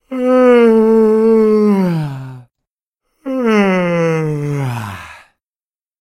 Oh, excuse me!
Recorded with Zoom H2.